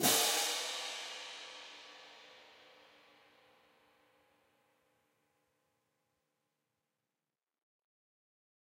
Marching Hand Cymbal Pair Volume 12
This sample is part of a multi-velocity pack recording of a pair of marching hand cymbals clashed together.